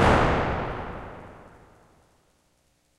spx90impulse
A very dark impulse response from my now-dead SPX90 reverb unit.
reverb, dark, impulse, room